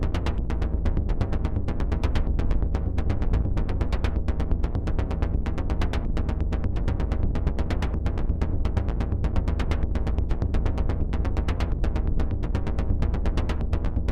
Drones and sequences made by using DSI Tetra and Marantz recorder.
Analog, Synth, Tetra